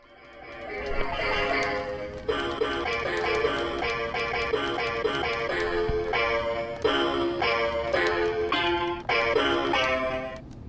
toy-guitar-playing
Alex Eliot jams out on a child’s guitar with buttons instead of strings in this sound recording.
music, guitar, jangly, toy